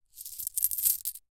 Handling coins, and pouring them back and forth between hands.
percussive, handling, money, metal, percussion, metallic, jingle, currency, coins